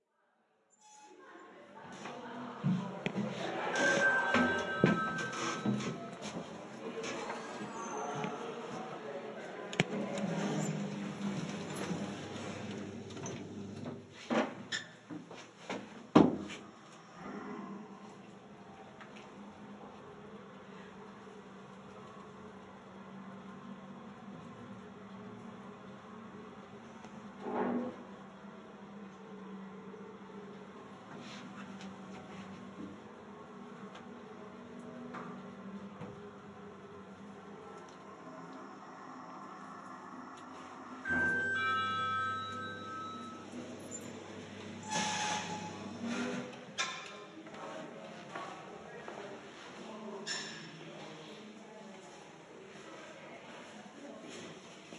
sounds of the entry into elevator and while it is moving
elevator, entering, inside, into, lift, sounds